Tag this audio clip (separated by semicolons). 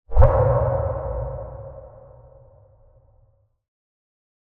atmosphere; effect; reverb